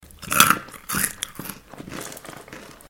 Mumble some food.